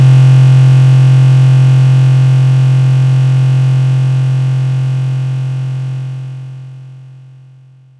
37 C3 Sine, hand made
Some C3 130.8128Hz sine drawed in audacity with mouse hand free, with no correction of the irregularities. Looping, an envelope drawed manually as well, like for the original graphical "Pixel Art Obscur" principles, (except some slight eq filtering).
glitch, handfree, computer, sine-wave